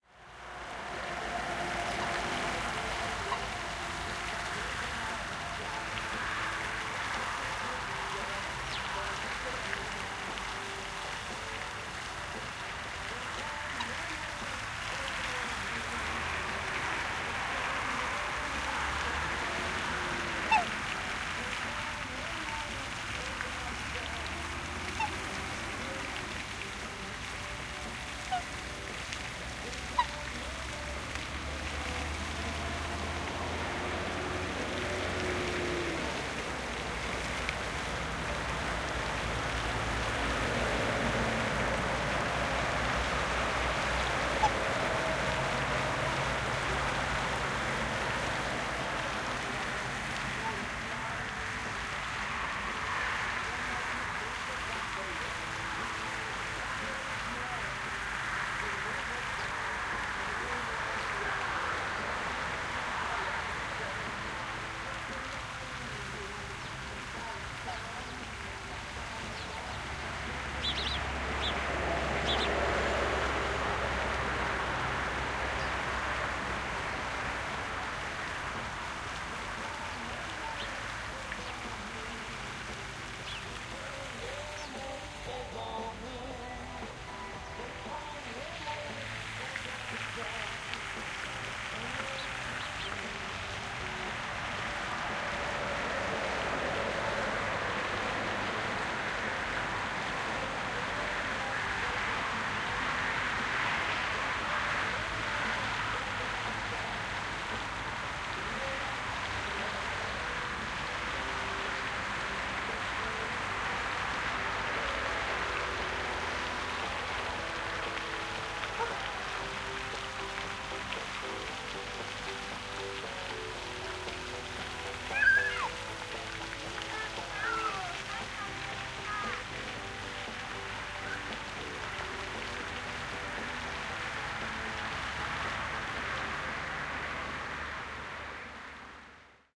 12092014 port2000 mostki pond with birds
Fieldrecording made during field pilot reseach (Moving modernization
project conducted in the Department of Ethnology and Cultural
Anthropology at Adam Mickiewicz University in Poznan by Agata Stanisz and Waldemar Kuligowski). Sound of pond with birds in the Port 2000 zoo along the national road no. 92. Port 2000 in Mostki is the biggest parking site in Poland. Recordist: Agata Stanisz